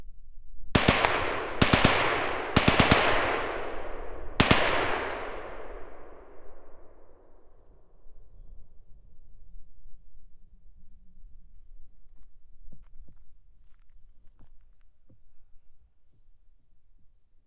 Maxim Russian machinegun
Maxim, russian 2nd world war powerful machine gun, very near, distance to gun about 40 meters. Recorded with 4 microphones, via Fostex ADAT
II, Machinegun, gun, authentical, world, WWII, russian, WW, guns, war